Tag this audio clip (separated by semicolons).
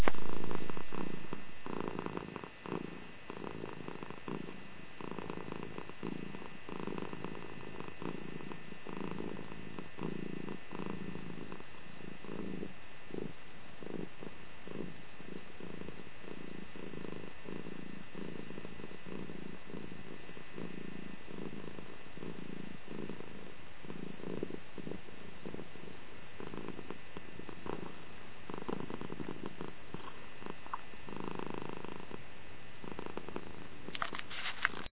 purring; kitten; purr; cat; kitty